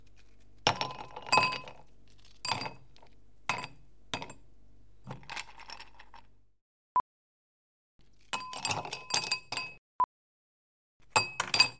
Ice in glass
catering, field-recording, pack, running, stereo, tap, water